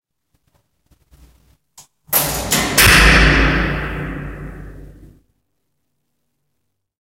beach door close
Closing a door in a hallway with a big echo.